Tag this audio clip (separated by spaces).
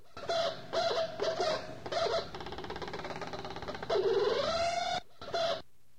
broken malfunction domain abuse